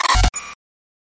Glitch sound.
This pack of sounds and transitions is made using the software "Ableton Live" and it is completely digital, without live recording. Exceptionally sound design. Made in early autumn of 2017. It is ideal for any video and motion design work. I made it as a sign of respect for my friends working with Videohive.